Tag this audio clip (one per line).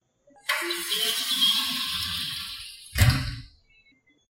close
metal
opening
window